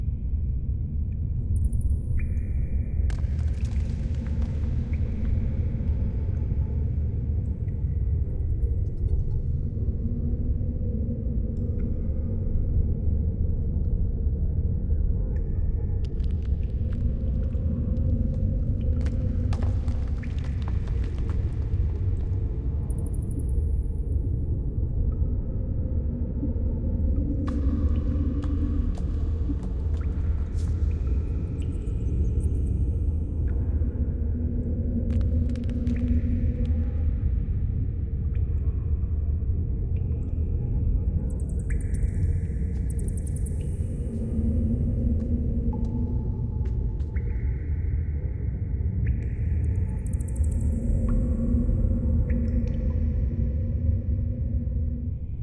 A sinister looping cave ambience sound to be used in fantasy games. Useful for all kinds of mysterious dark areas that are going to be explored.